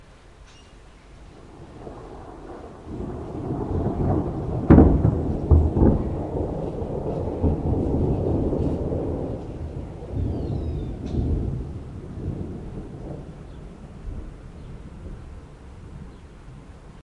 One of the thunderclaps during a thunderstorm that passed Amsterdam in the morning of the 10Th of July 2007. Recorded with an Edirol-cs15 mic. on my balcony plugged into an Edirol R09.